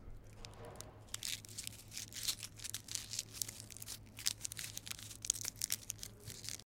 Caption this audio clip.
Gum Wrapper Slow
A crinkling gum wrapper.
gum, gum-wrapper